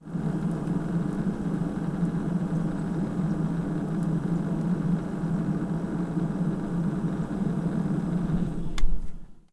Refrigerator Running recorded from the exterior